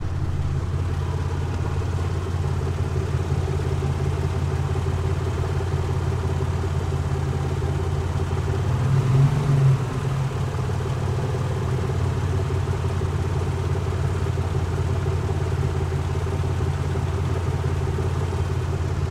idle MITSUBISHI PAJERO front engine
Mitsubishi Pajero idle in Moscow traffic, engine, front perspective.
automobile, car, engine, idle, Moscow, motor, Russia, vehicle